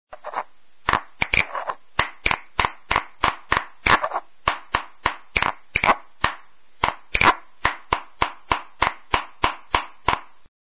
Thai MorTar Pestle
The sound of crushing Thai pestle in mortar. Continous loop is possible
Cooking, Crushihng, Effect, Grinding, Mortar, Pestle, Stone, Thai